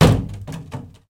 Plastic, jerrycan, percussions, hit, kick, home made, cottage, cellar, wood shed